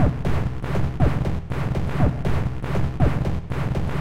I have used a VST instrument called NoizDumpster, by The Lower Rhythm.
You can find it here:
I have recorded the results of a few sessions of insane noise creation in Ableton Live. Cut up some interesting sounds and sequenced them using Reason's built in drum machine to create the rhythms on this pack.
All rhythms with ending in "Rr" are derived from the rhythm with the same number, but with room reverb added in Reason.